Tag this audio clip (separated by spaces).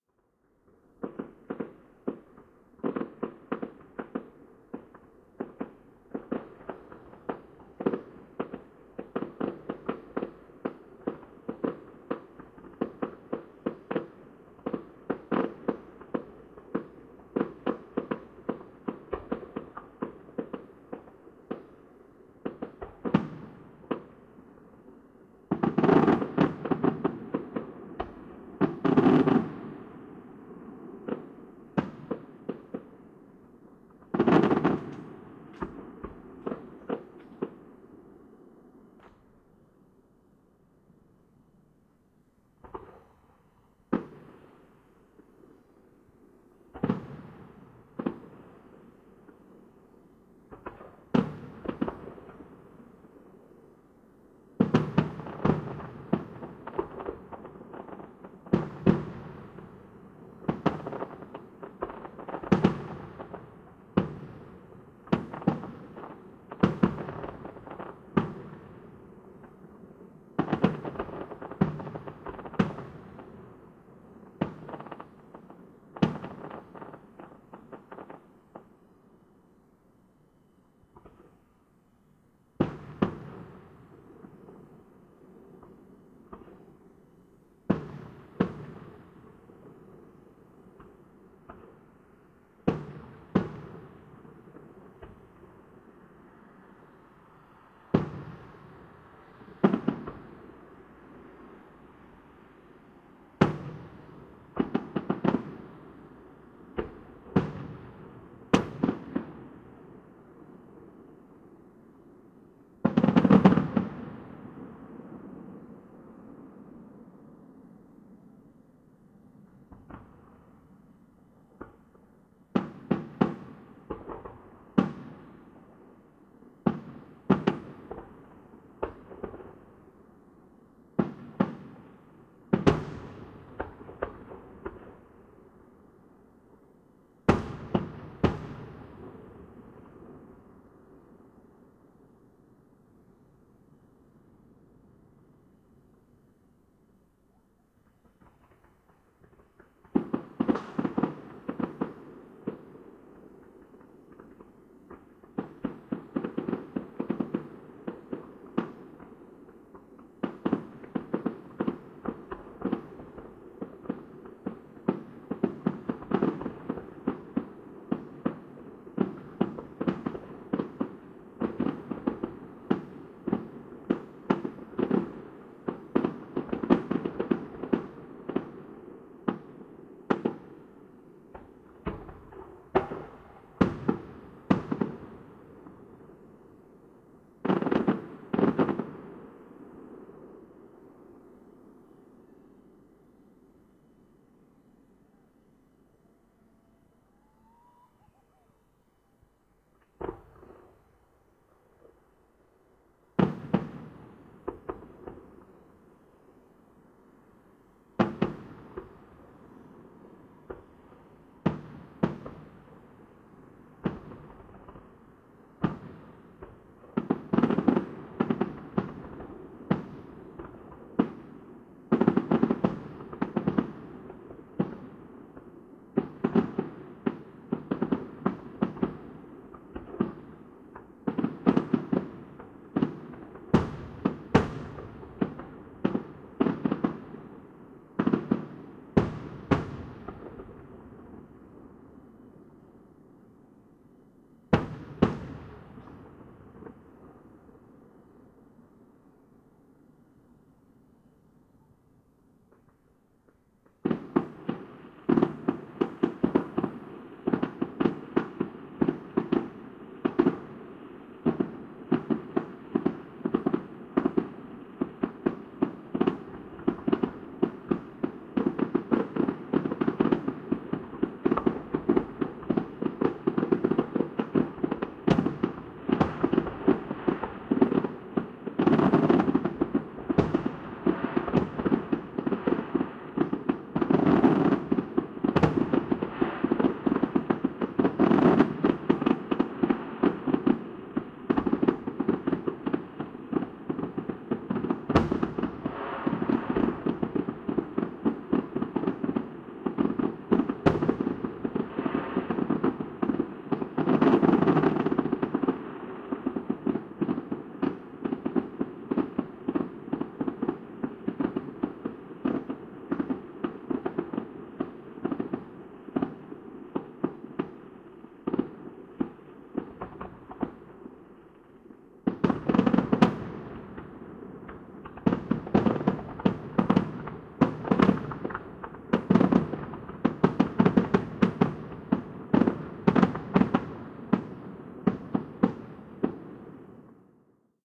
fire-crackers rockets